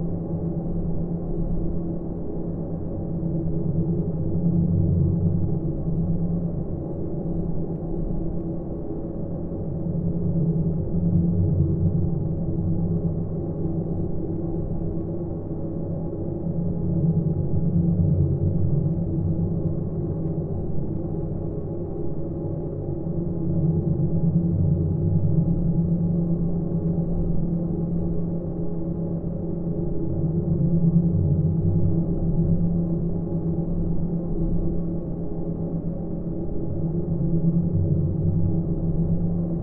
Untitled cave
Tense atmosphere, I think it's the subtle high lfo I used that makes it so tense
horror, creepy, tense, loop, cave, air, dark